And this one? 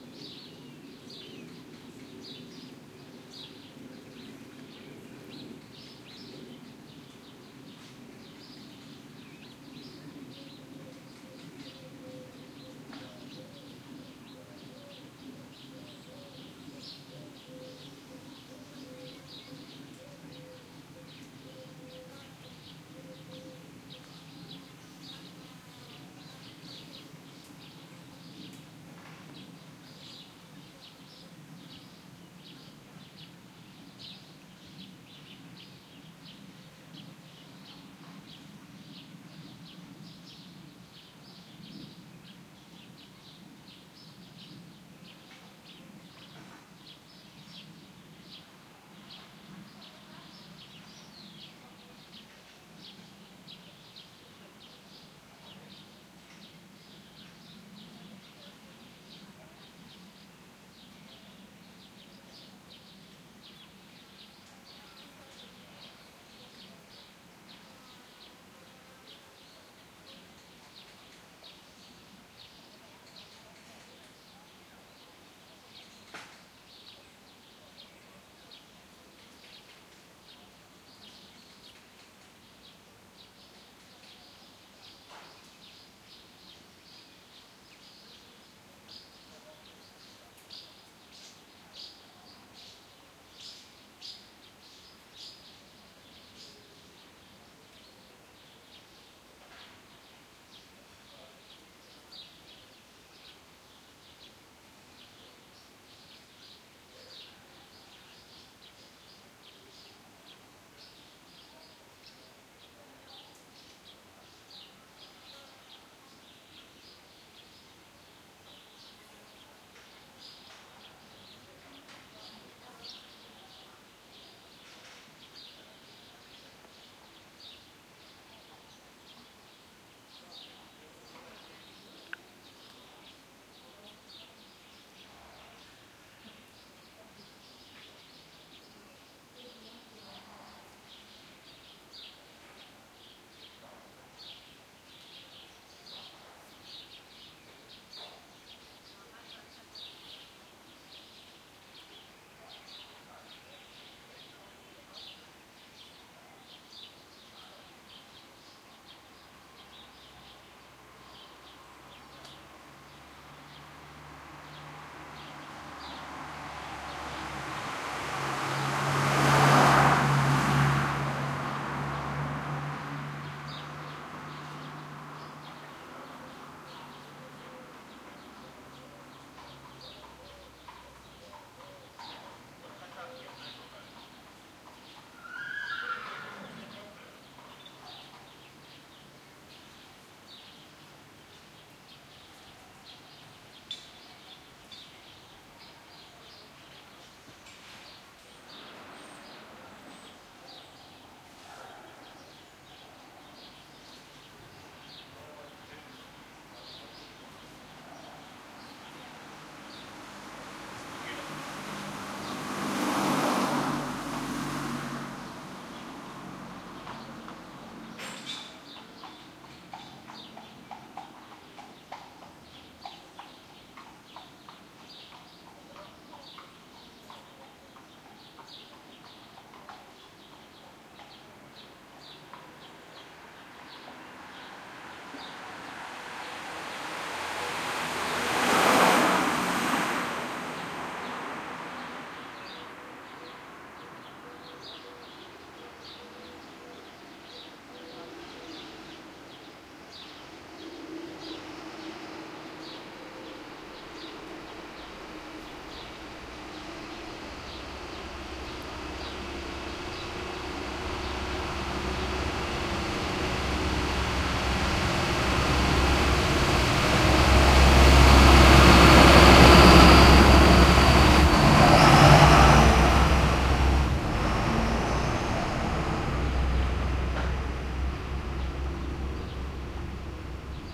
country village backyard or front yard driveway courtyard air tone birds passing cars and horse and distant voices +loud big truck pass end
air, backyard, country, courtyard, front, tone, town, village, yard